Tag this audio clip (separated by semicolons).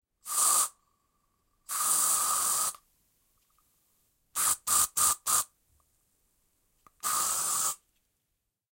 aerosol; burst; short; spray